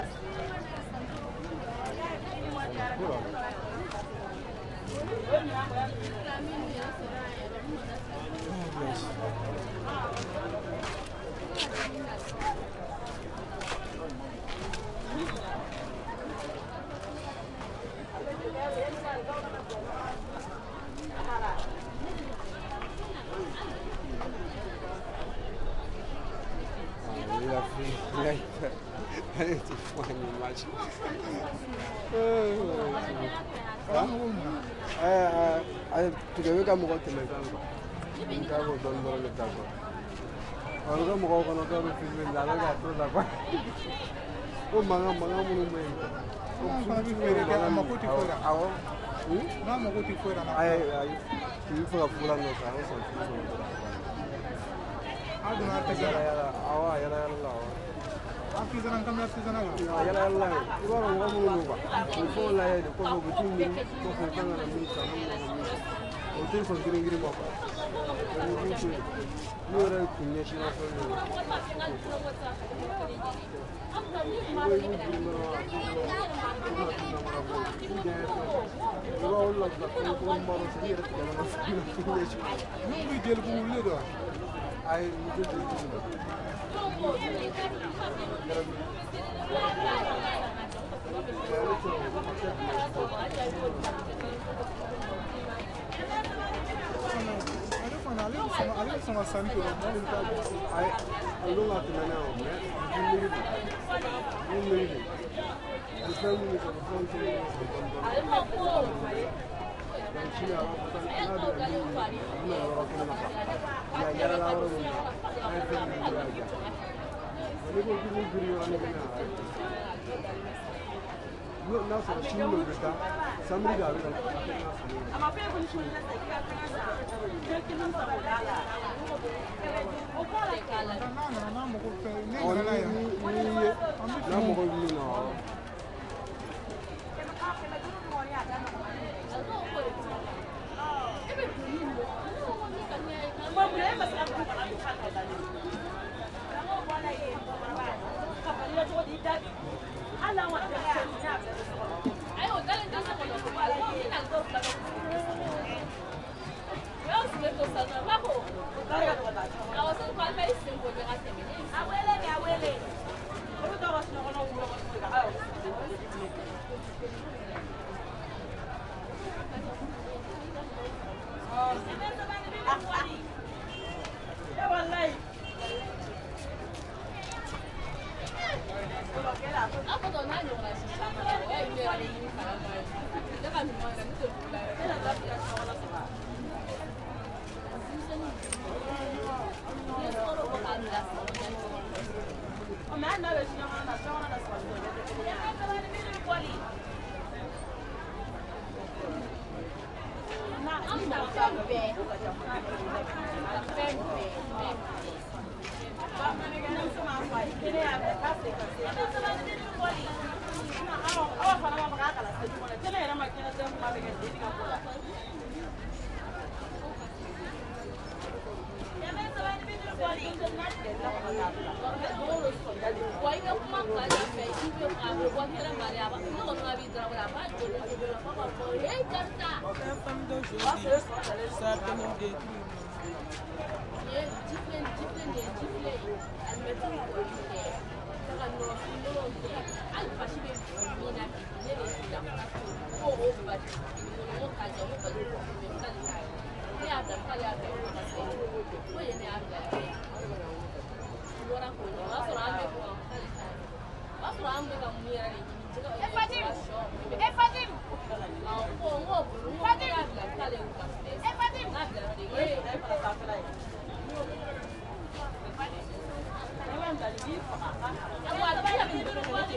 This pack contains recordings that were taken as part of a large project. Part of this involved creating surround sound tracks for diffusion in large autidoria. There was originally no budget to purchase full 5.1 recording gear and, as a result, I improvised with a pair of Sony PCM D50 portable recorders. The recordings come as two stereo files, labelled "Front" and "Rear". They are (in theory) synchronised to one another. This is a recording taken in one of the small streets in the central market of Bamako, Mali.